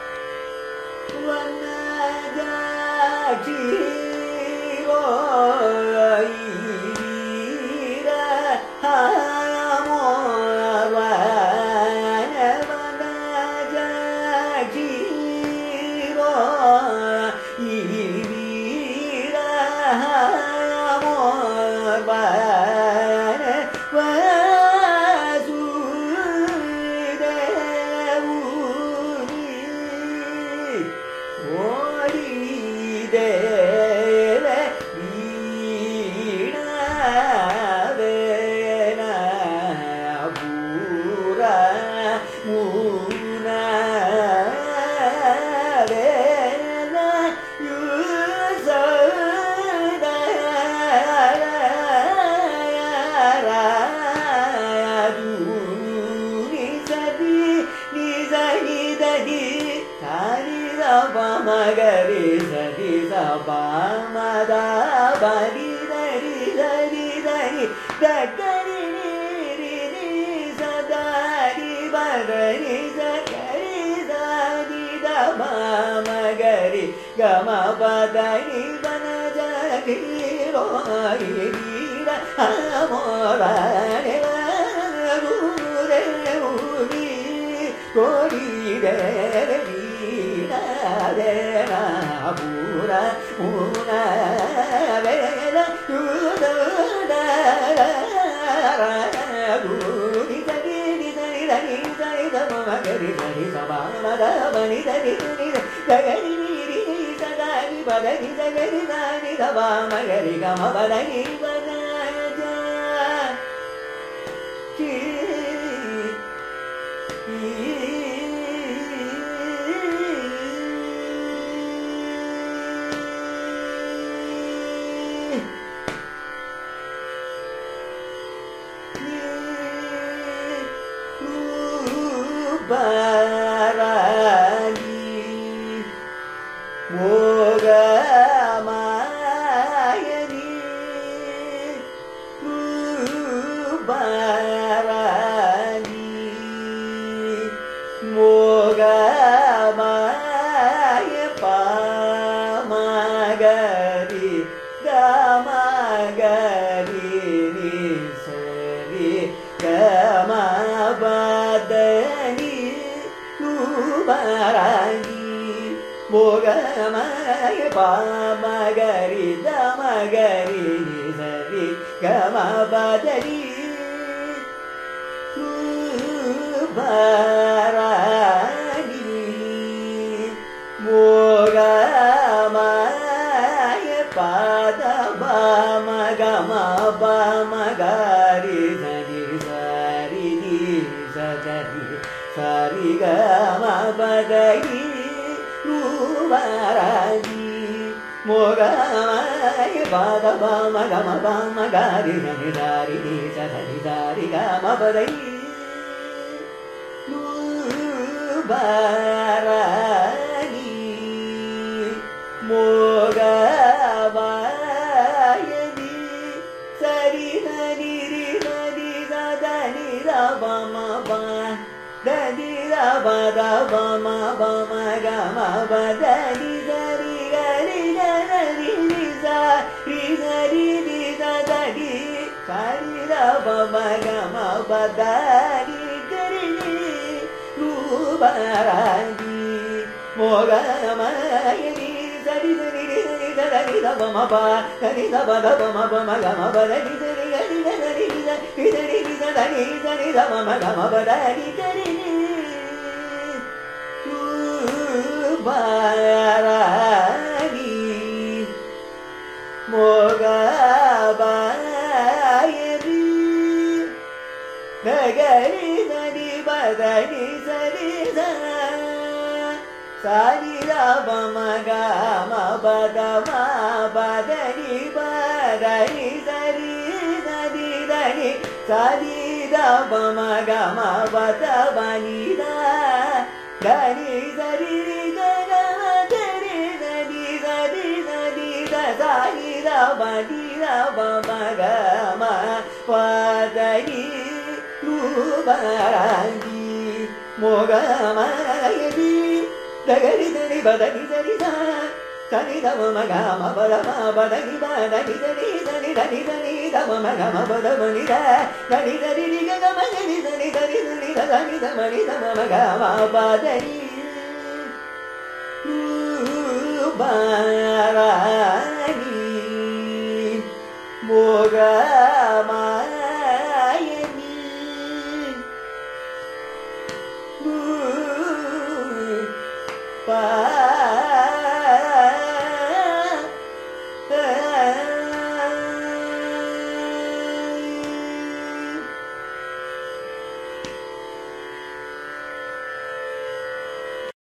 Carnatic varnam by Prasanna in Kalyani raaga
Varnam is a compositional form of Carnatic music, rich in melodic nuances. This is a recording of a varnam, titled Vanajakshiro, composed by Ramnad Srinivasa Iyengar in Kalyani raaga, set to Adi taala. It is sung by Prasanna, a young Carnatic vocalist from Chennai, India.